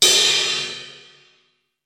Drum kit sampled direct to my old 486DX no processing unless labeled. I forget the brand name of kit and what mic i used.
cymbal, drum, kit, percussion